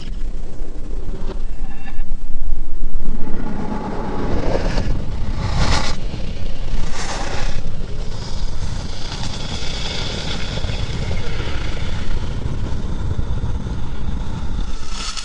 Processed version of ripper. Pitched and sonically mangled in cool edit 96.
processed, recording